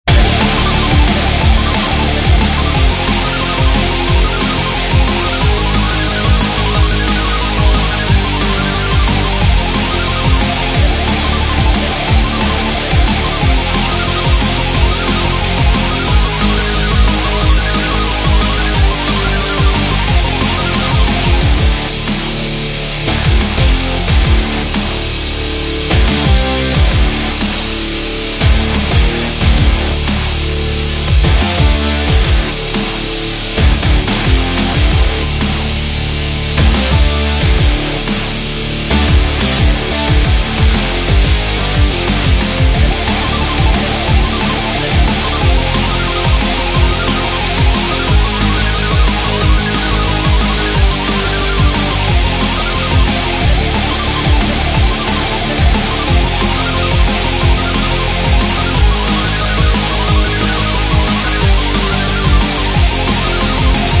Heavy synth metal. Got the tone by layering 18 guitar patches, as well as 3 basses.